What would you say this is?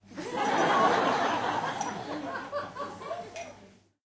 LaughLaugh in medium theatreRecorded with MD and Sony mic, above the people
czech, prague, laugh, auditorium, theatre, crowd, audience